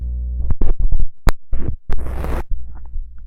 tv glitch 2
Pulling the contact mic off the TV resulted in a strange rhythmic loop.